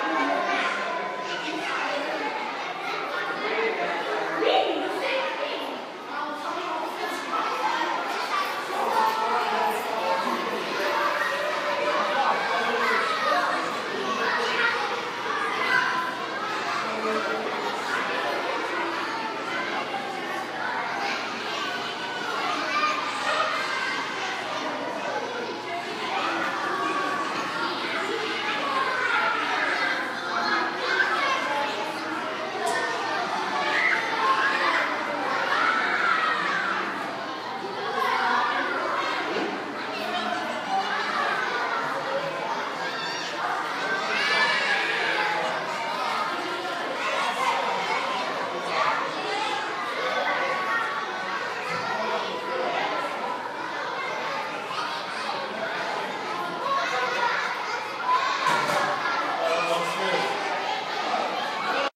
School Kids At Lunch iPhone
School kids recorded in the cafeteria during their lunch hour.
cafeteria, child, children, kid, kids, loud, lunch, school, shouting